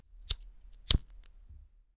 this is the sound of a (butane gas) lighter, with a crackling noise in the end.
fire, flame, flintstone, gas, lighter, propane